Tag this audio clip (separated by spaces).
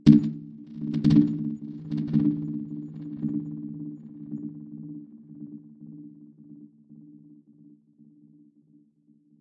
drums dub experimental percussion reaktor sounddesign